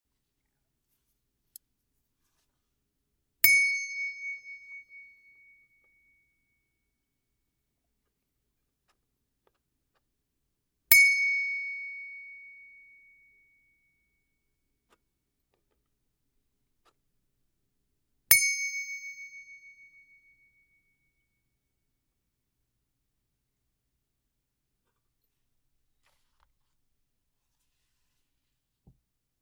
Small Japanese brass bell hit with metal object.
brass, bell, ring, ting, metal, ding